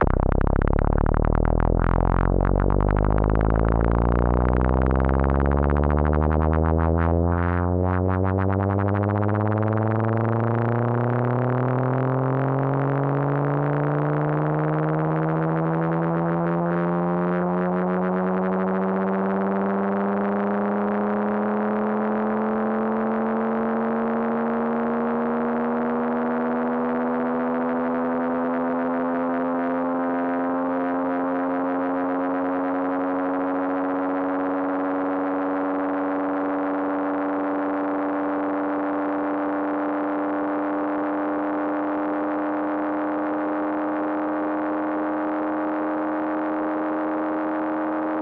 a racing car sound i synthesized using prologue vst
drive, speeding